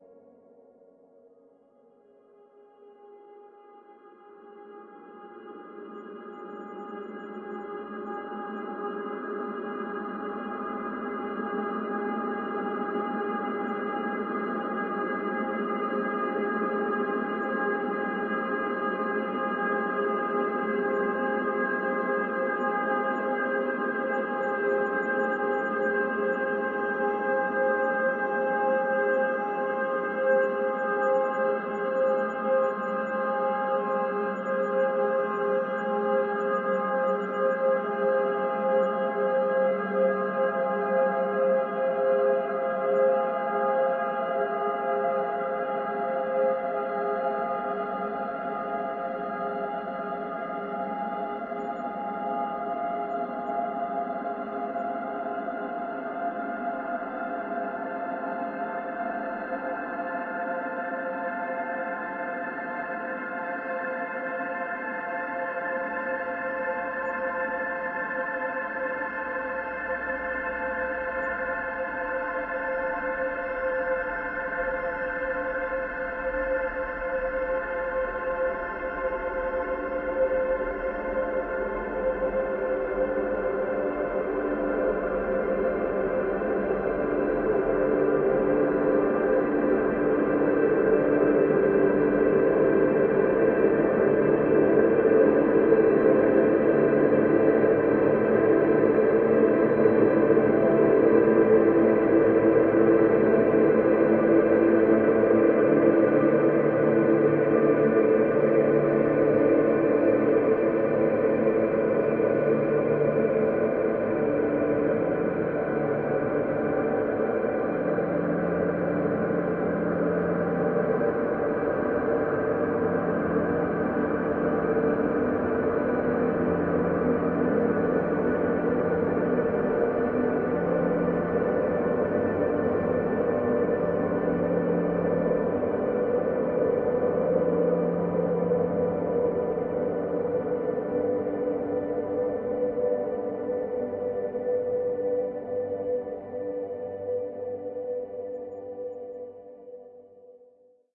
LAYERS 008 - MegaDrone PadScape - G4
LAYERS 008 - MegaDrone PadScape is an extensive multisample package containing 97 samples covering C0 till C8. The key name is included in the sample name. The sound of MegaDrone PadScape is already in the name: a long (over 2 minutes!) slowly evolving ambient drone pad that can be played as a PAD sound in your favourite sampler. It was created using NI Kontakt 3 within Cubase and a lot of convolution (Voxengo's Pristine Space is my favourite) as well as some reverb from u-he: Uhbik-A.
DEDICATED to XAVIER SERRA! HAPPY BIRTHDAY!
ambient, artificial, evolving, multisample, pad, soundscape